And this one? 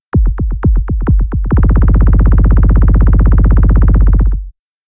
Bassy JINGLE 2 mark
A very sub-bassy clip - suitable as an "audio-Mark", part of jingle, electro drum-fill, etc.